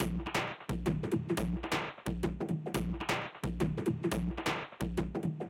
dnb distorted atmosphere rhythm 175
Dark beat layer you can use with drum & bass beat.
175bpm, dance, dark, deep, dnb, effect, jungle, processed, rhythm, stereo